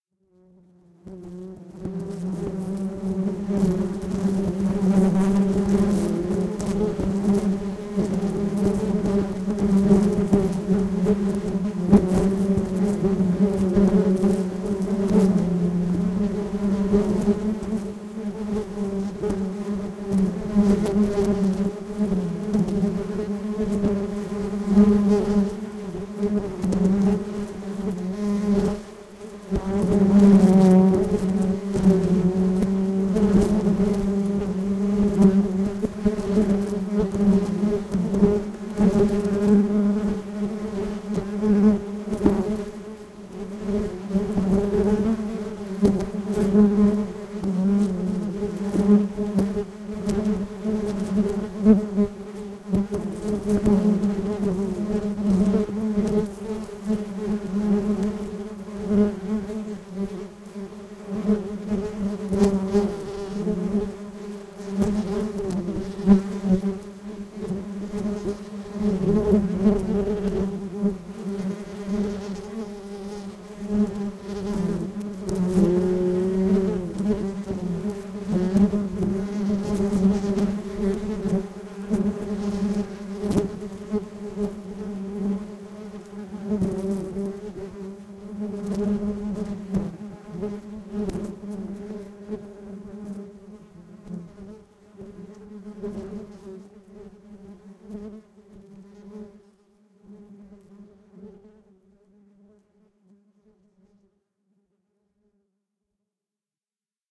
The sound of a swarm of wild bees in the Altay forest. Zoom 2 in the center of the swarm. Bees fly around and sting windshield.
Altay, animals